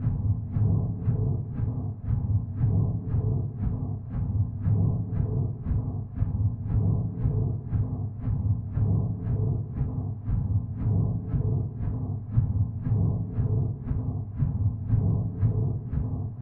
The sound of a muffled creepy dungeon machine. You can imagine hearing it through a small window in the masonry. Enjoy it. If it does not bother you, share links to your work where this sound was used.
Note: audio quality is always better when downloaded.